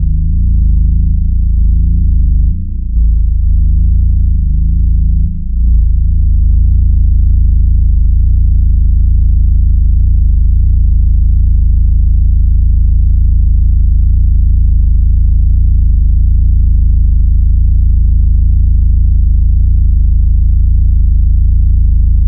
Noises of giant engines or something similar.
Synthesized with a Doepfer A-100 modular system using mainly the A-188-2 Tapped BBD Module.
Recorded using a RME Babyface and Steinberg Cubase 6.5.
It's always nice to hear what projects you use these sounds for.
You can also check out my pond5 profile. Perhaps you find something you like there.